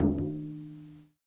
Tape Hand Drum 13
Lo-fi tape samples at your disposal.
Jordan-Mills, collab-2, drum, hand, vintage, mojomills, lo-fi, lofi, tape